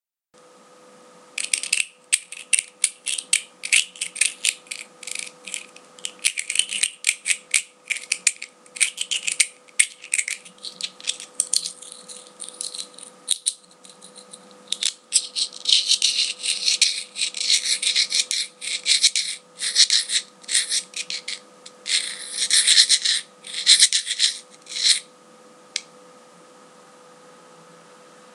sound4-2shells
This sound was created by two shells.
The one has got sharp textures, the other one smooth surface. I rub them together to make this sound.
Hope it'll be useful.
creative, rub, sea, shells, sound, two